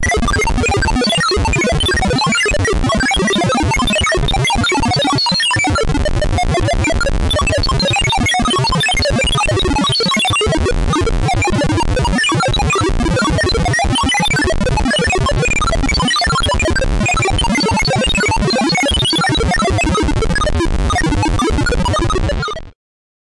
Computer Does Calculations 4 (Very Long)
The sounds of an operating computer, playing for a fairly long time. Beep, boop, you can literally hear the metaphorical wheels turning.
computer, machine, operating